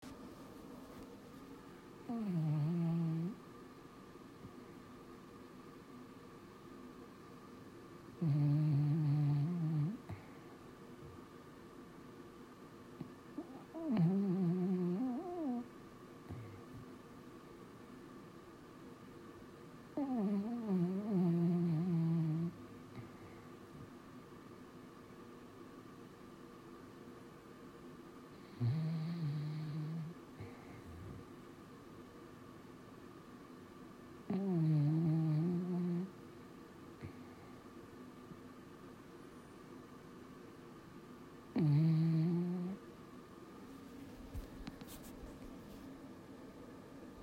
My dog is adorable whether she's sleeping or awake.